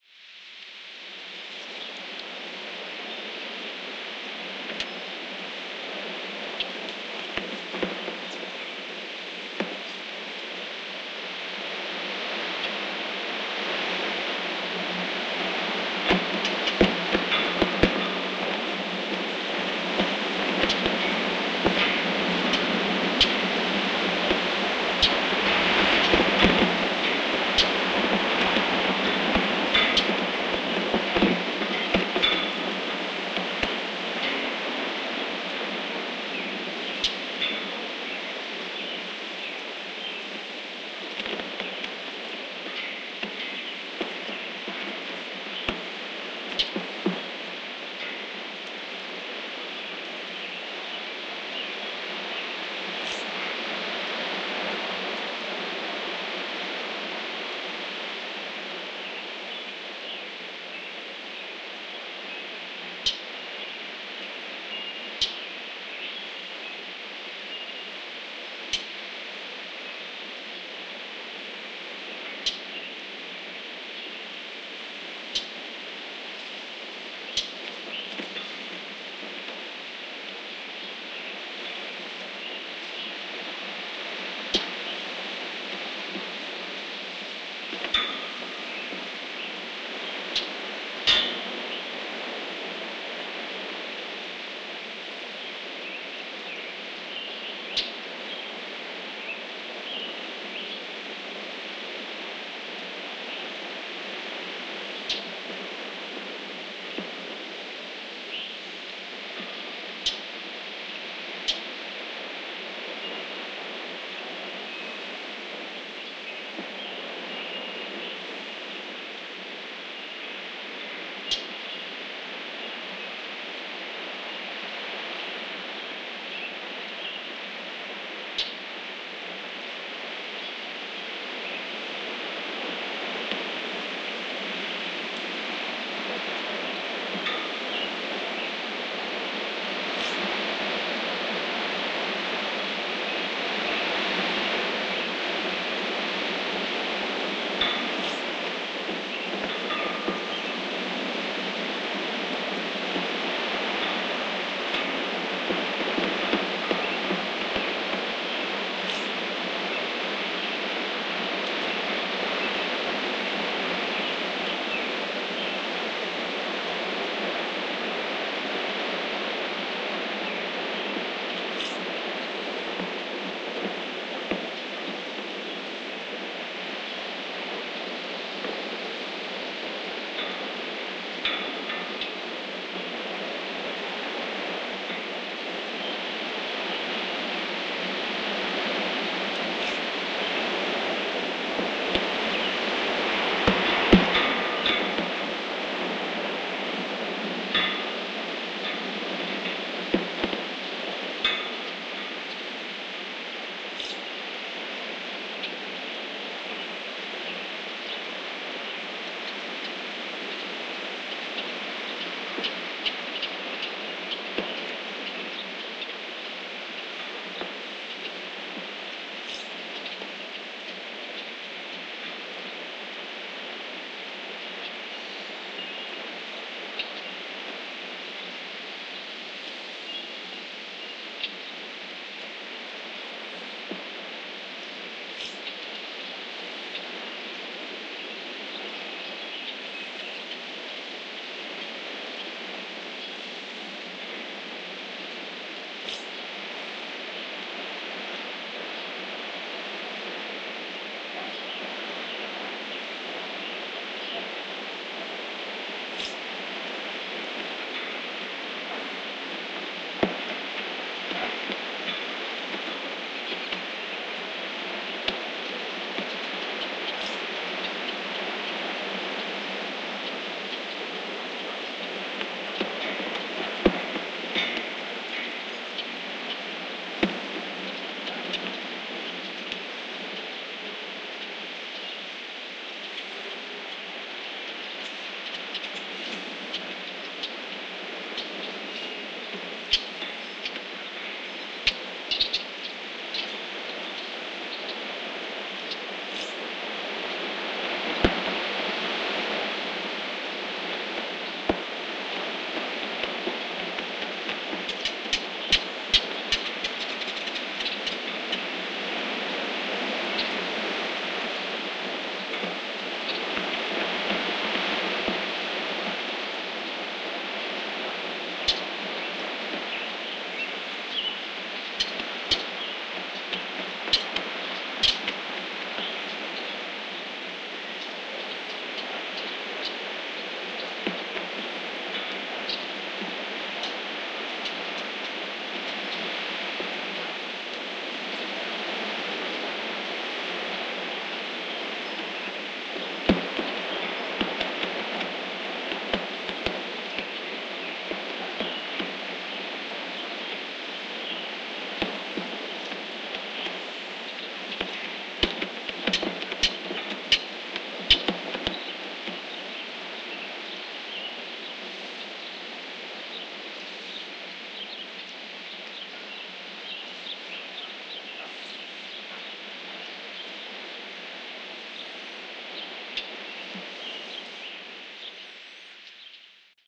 AMB S Ext Flagpole Wind Birds
This is a nice recording of a flagpole in front of the country court house. Birds and wind, and the occasional clank of the rope on the pole.
Recorded with: Fostex FR2Le, AT BP 4025 stereo mic
clank, cloth, flag, flagpole, rope